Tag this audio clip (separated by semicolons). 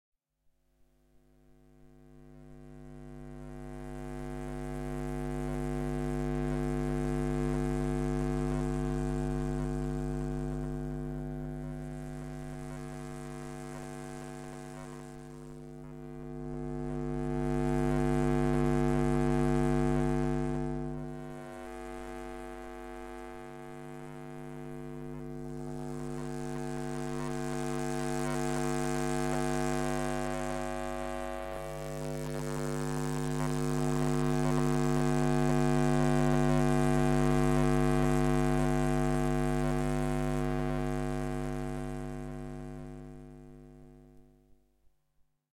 experimental; sound-trip; sound-enigma; electronic